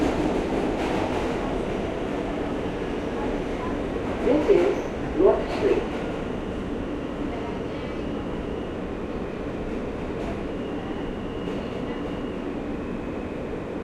Subway Operator Amb Interior 03
Subway station announcement, interior recording, female voice
field-recording, H4n, MTA, NYC, subway, Zoom